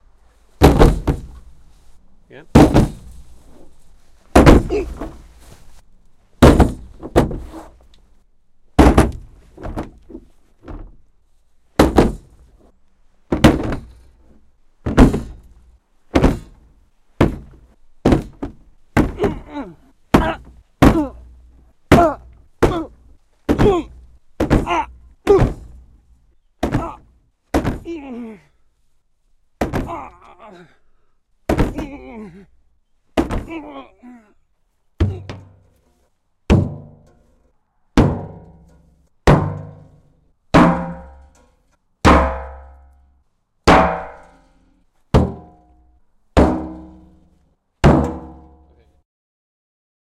Foley man hitting a car bonnet various
Numerous recordings of a human striking a car door, bonnet etc. Designed as elements to be combined for car crash effects.
foley, accident, crash